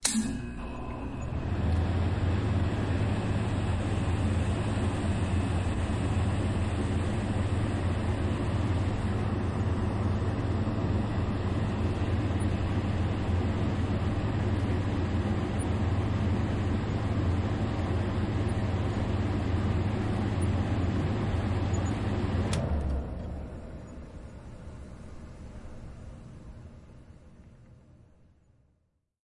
AC air conditioner On Off
My first uplaoded FX ever :)
This is a stereo recording for an air conditioner turning on and off